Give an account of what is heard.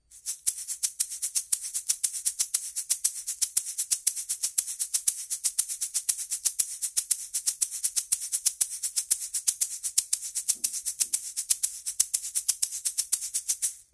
Shaking a shaker egg in a 1/16 swinging samba groove, slower. Vivanco EM35, Marantz PMD671.